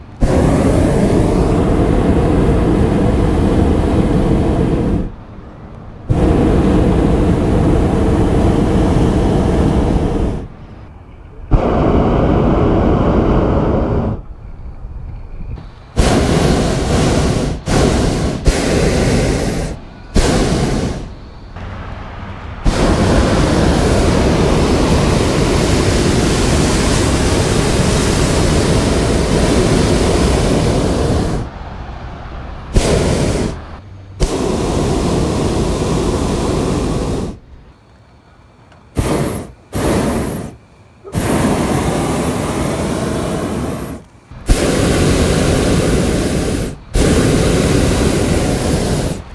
Sound of Hot Air Ballon gas torch